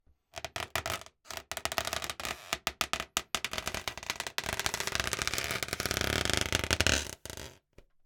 Balloon Creak Long Twist 1
Recorded as part of a collection of sounds created by manipulating a balloon.
Rope, Wood, Creak, Twist, Door, Squeak, Ship, Board, Long, Floor, Balloon, Close